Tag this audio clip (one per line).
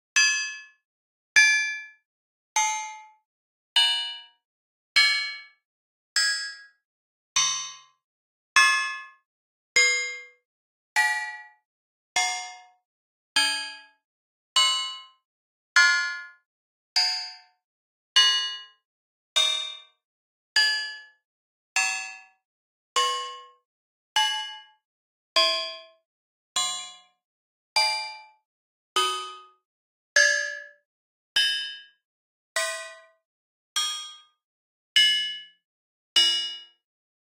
hard
sword
bright
clank
brutal
unique
hit
metalic